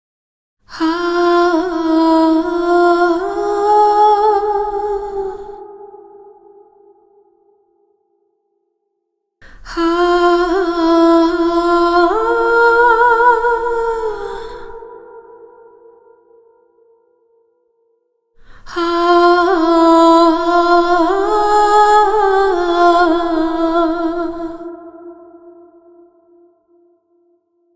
Wordless female vocal I made for ya'll. 80bpm, 4/4 time. The clip starts on the last beat of the previous measure, and I left about a measure at the end for the reverb to breathe. I'll upload a few more clips in the same tempo/key in the next few days.
Thank you for remembering to credit to Katarina Rose in your song/project description. Just write "vocal sample by Katarina Rose" in the project description. It's as easy as that!
Recorded in Ardour, using a t.bone sct-2000 tube mic, and edirol ua-4fx recording interface. Added compression, reverb, and eq adjustments. Any squeaking sounds present are only on the streamed version; the downloadable clip is high quality and squeak-free.